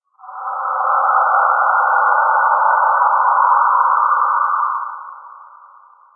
a drone produced from heavily processed recording of a human voice
drone, processed, voice